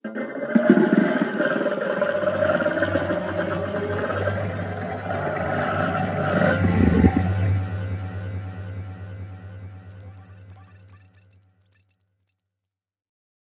Creative Sounddesigns and Soundscapes made of my own Samples.
Sounds were manipulated and combined in very different ways.
Enjoy :)
Alien, Ambient, Sci-Fi, FX, Sounddesign, Sound-Effect, Artificial, Atmospheric